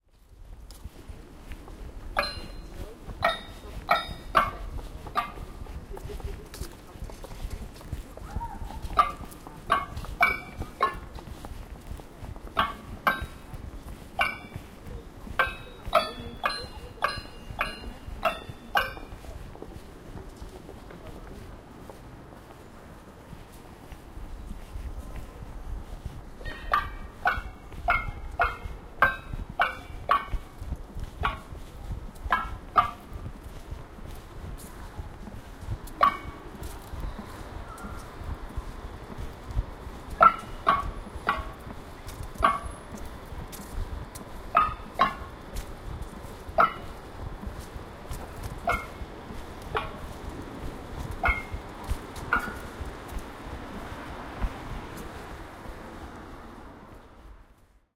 Phantom Railings walking alone
Sound from the public intervention "Phantom Railings" (2012) in Malet Street Gardens, Bloomsbury, London. Walking along a wall with sensors that trigger metallic sounds, which vary according to pedestrian's speed and proximity. The interactive sound sculpture wants to make evidence of the absence of railings, which were removed from this park during the WWII. Recorded with a Zoom H1 Handy Recorder.
gardens, parks, footsteps, railings, iron, pedestrians, public-installation, steps, walk, metallic, walking, sound-art